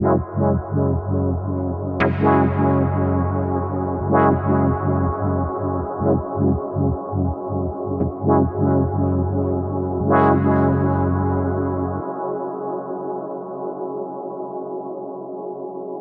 KFA10 120BPM
A collection of pads and atmospheres created with an H4N Zoom Recorder and Ableton Live